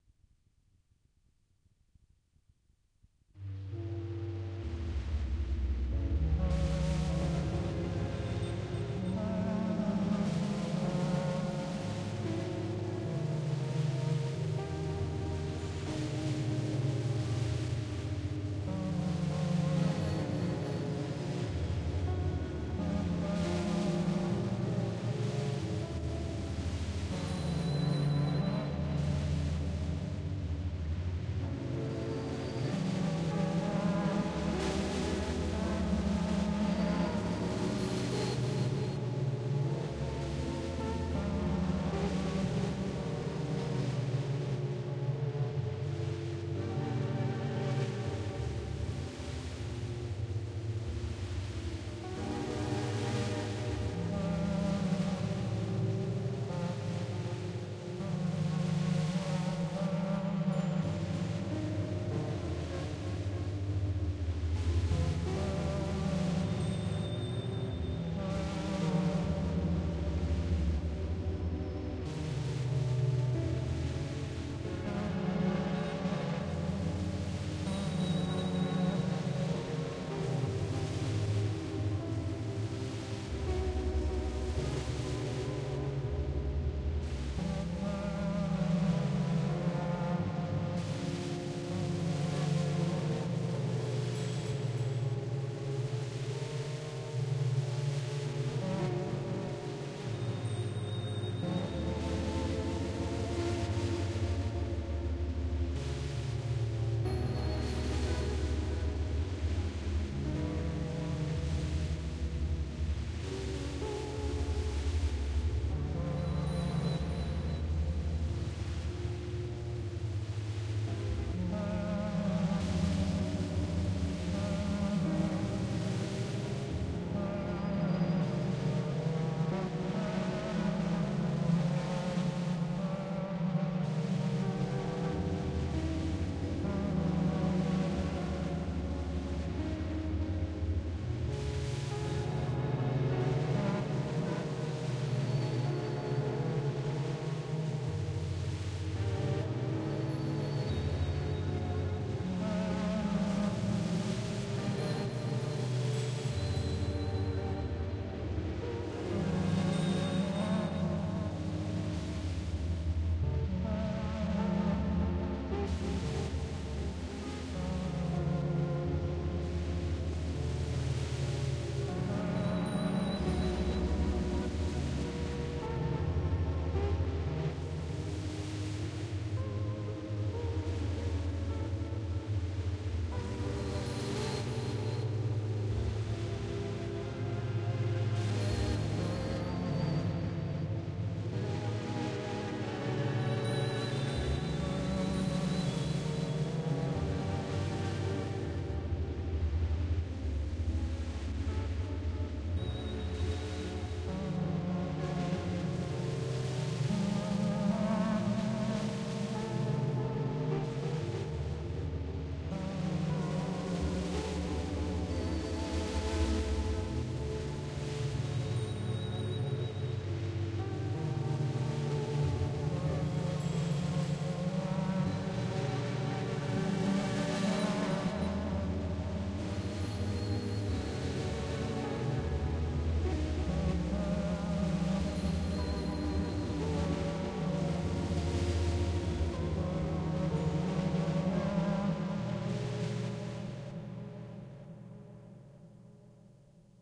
8tr Tape Sounds.